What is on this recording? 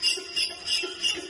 Machine loop 16
Various loops from a range of office, factory and industrial machinery. Useful background SFX loops